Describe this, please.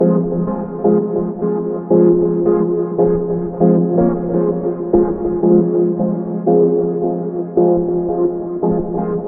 Synth Loop 7
Synth stabs from a sound design session intended for a techno release.
design, electronic, experimental, line, loop, music, oneshot, pack, sample, sound, stab, synth, techno